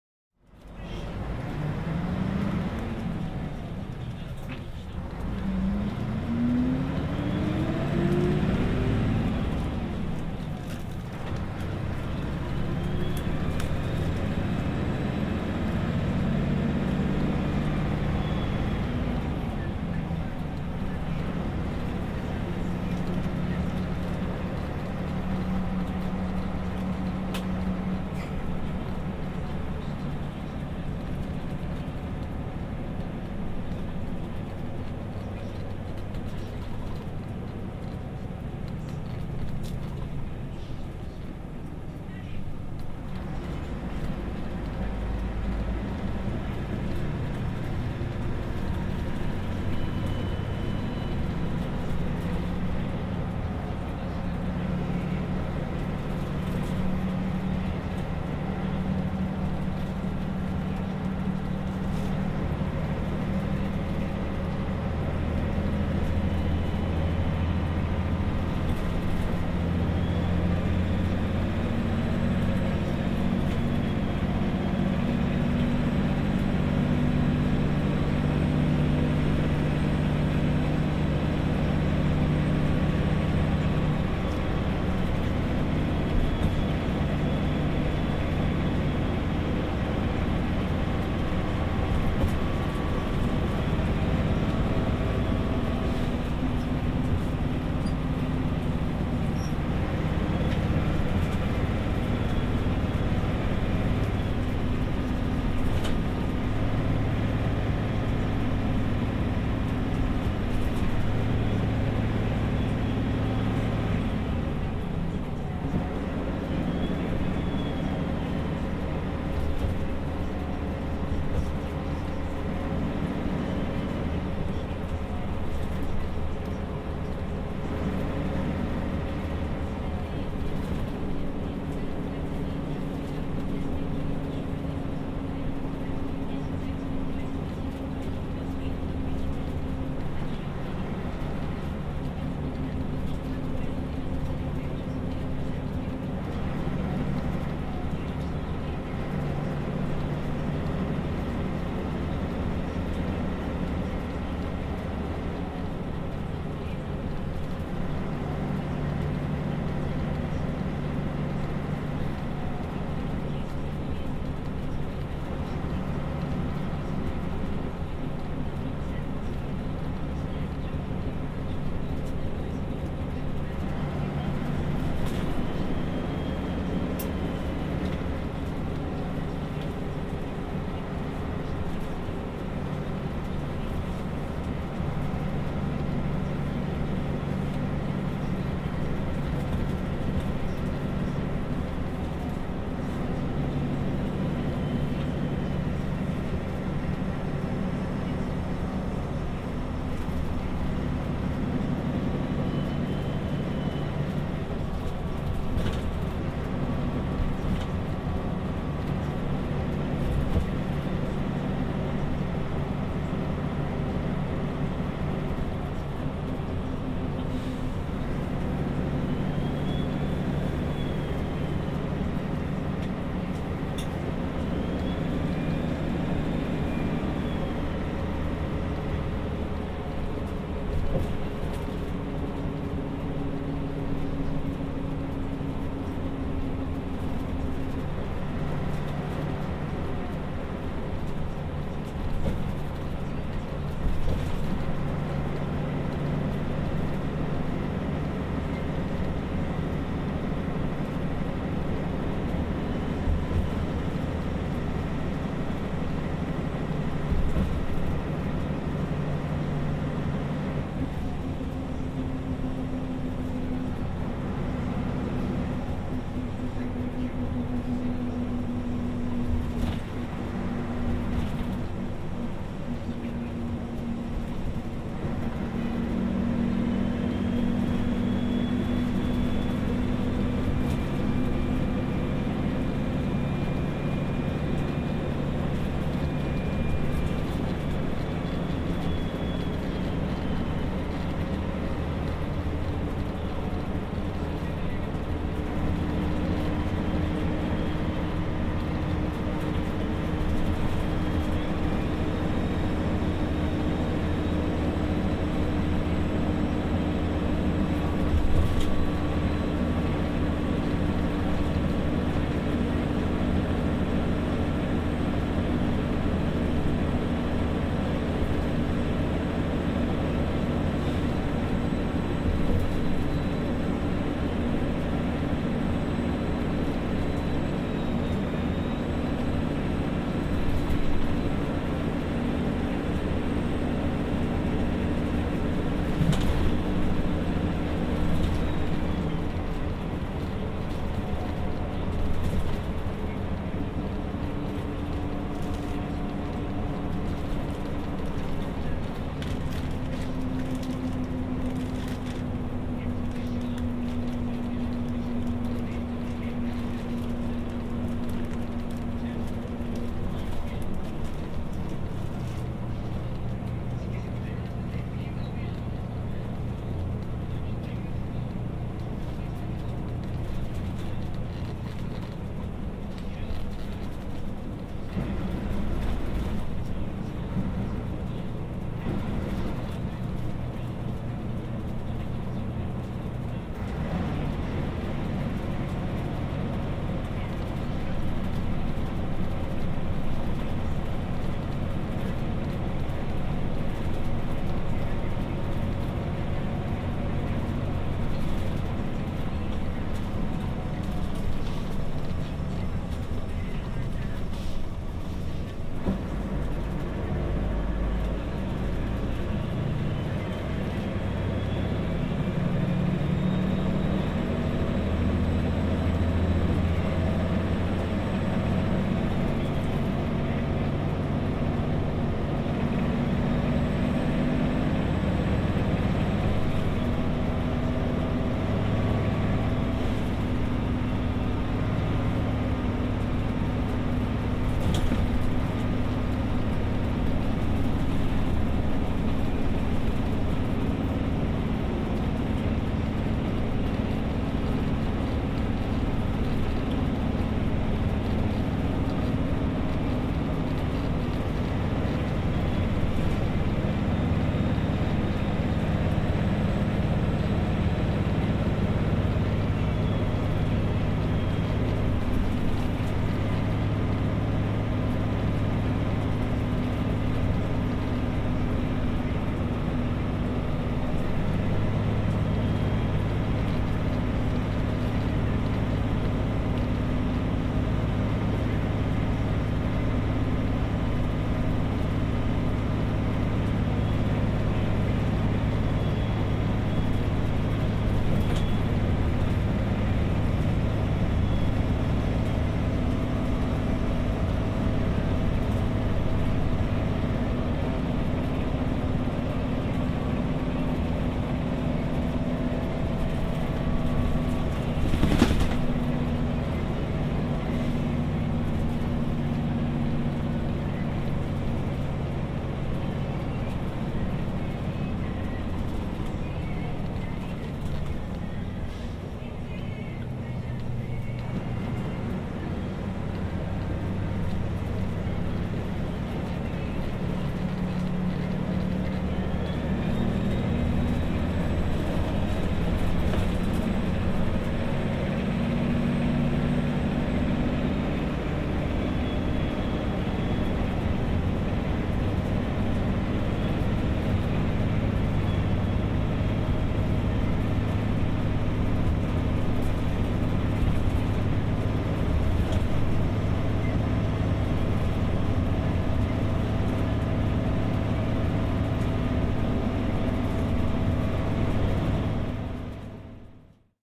Foley recording of the interior of a bus driving between Gwangju and Mokpo, South Korea. Recorded near the back of the bus, lots of engine noise, some radio can be heard (Korean), slight peak compression.